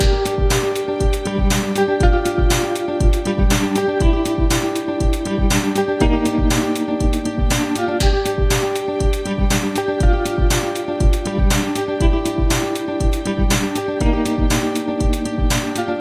Loop NeverGiveUp 05
A music loop to be used in storydriven and reflective games with puzzle and philosophical elements.
indiedev, videogame, gaming, music, Puzzle, sfx, game, videogames, gamedev, games, gamedeveloping, indiegamedev, loop, video-game, music-loop, Thoughtful, Philosophical